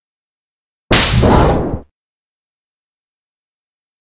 Combination of foley and synth sounds.